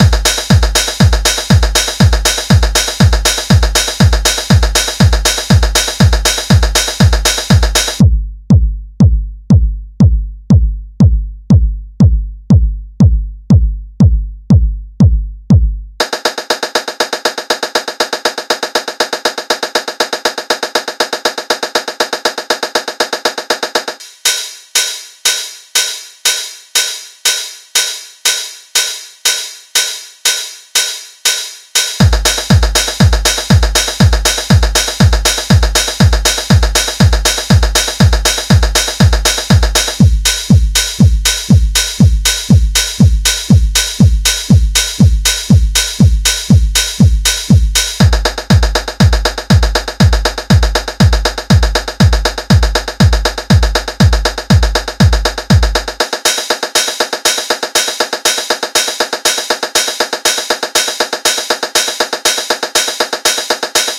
I made a drumloop out of three 909 samples in Ableton and EQ'd it a bit.
Meant to be sliced into +- 8 parts for sampling.
techno, 909, beat, dance, drum-loop, loop, drum